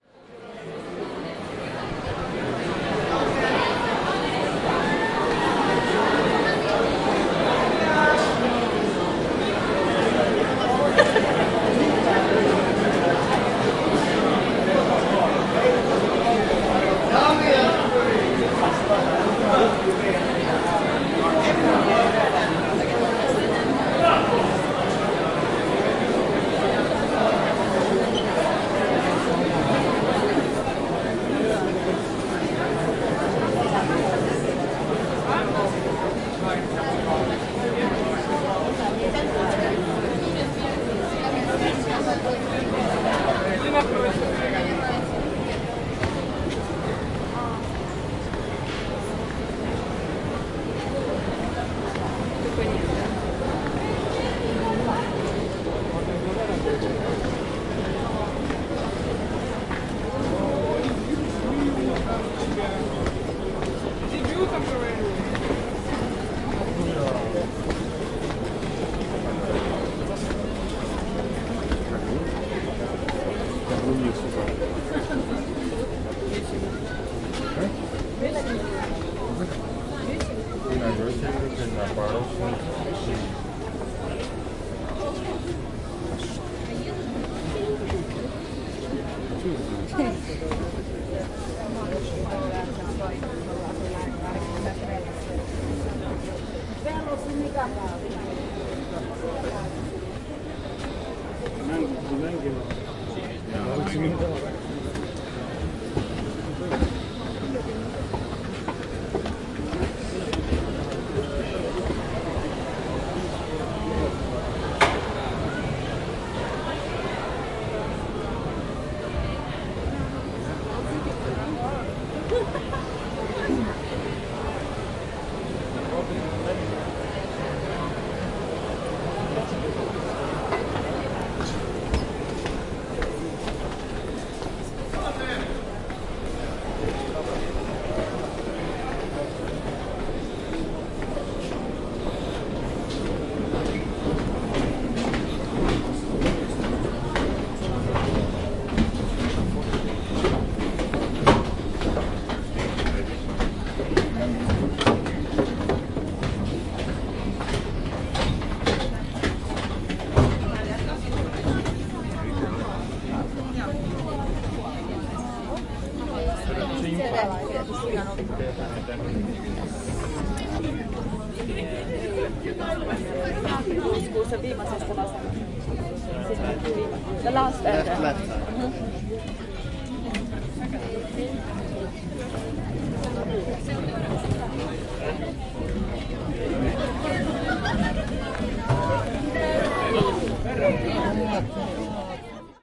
meno terminaalista laivaan - entering into ship from terminal
MEno terminaalista laivaan, liukuportaat. Poistettu selvimpiä puheita. Entering into ship from ship terminal. Using escalator. Removed some clear talk. Date: 2015/11/14 Place: Helsinki recorded with zoom h2n and edited with audacity
ship, entering